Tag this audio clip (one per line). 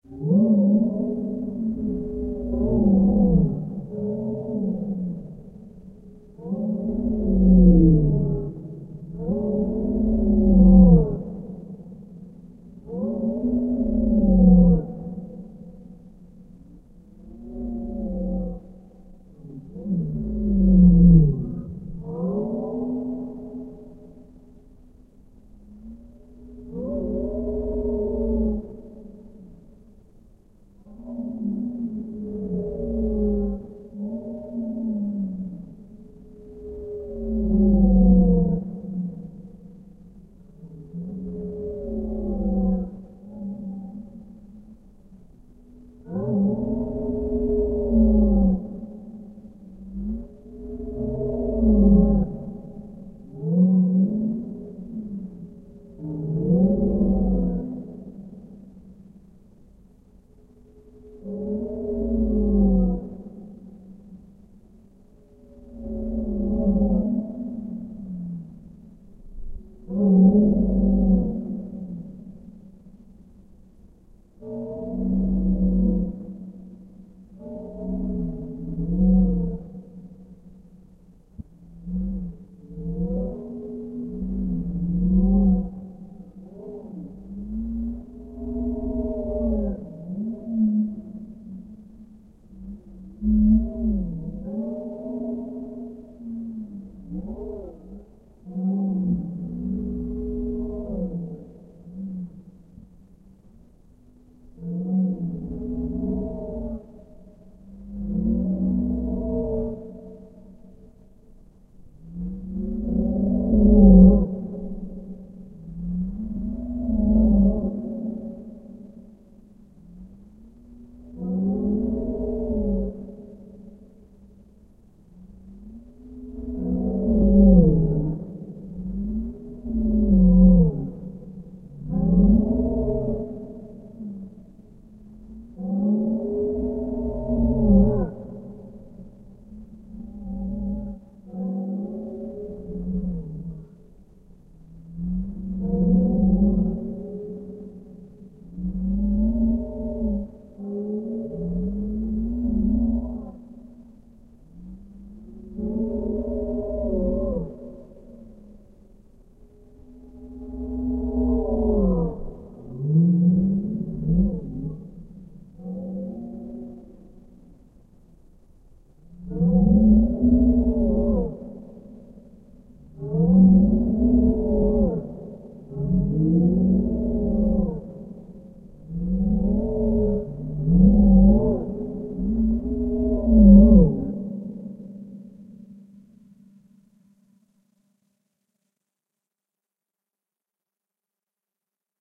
dish
low
pitched
processed
space
water
whale